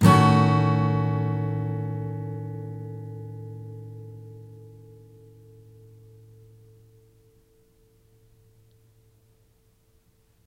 chord Fsharpm6
Yamaha acoustic through USB microphone to laptop. Chords strummed with a metal pick. File name indicates chord.
guitar, acoustic, chord, strummed